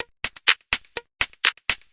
Same loop as "minimalish" without the instruments.
minimal, loop, percussion